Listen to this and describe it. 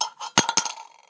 Single coin dropped into a tin